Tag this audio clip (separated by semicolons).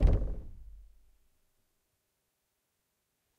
bang; closed; door; hit; knock; percussion; percussive; tap; wood; wooden